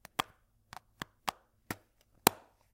Magnets - What do they sound like?
energy, magnet, magnetic, magnetism, magnets, metal, physics, power, radiation